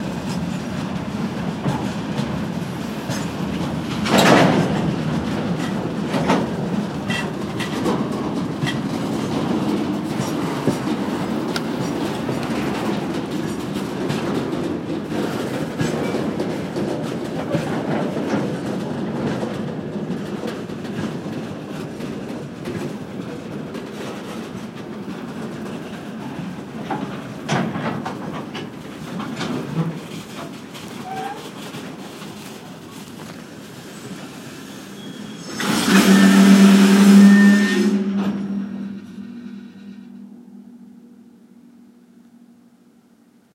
Freight train stops
The freight train moves slowly and stops.
ambience, noise, recorder, stops, train